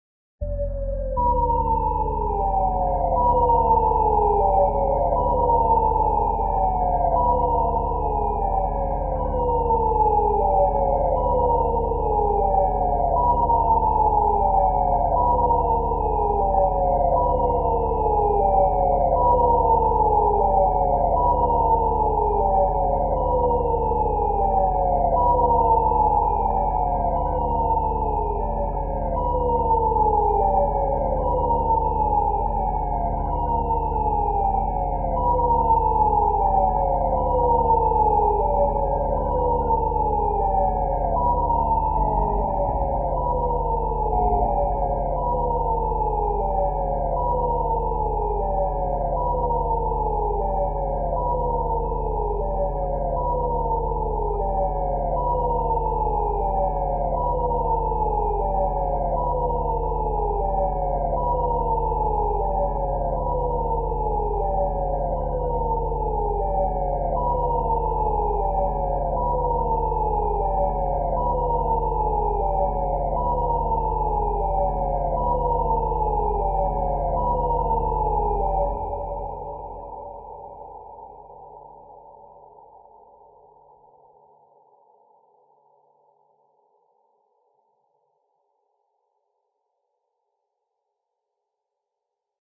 siren WTC
synthetic siren sound created in Cubase
air-raid, alarm, ambient, apocalypse, city, dark, disaster, drone, emergency, horn, hurricane, signal, siren, tornado, town, war, warning